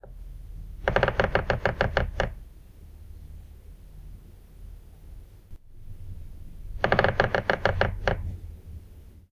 A piliated woodpecker hammering on a tree. Two quick bouts of pecking. Recorded with an Olympus LS-14.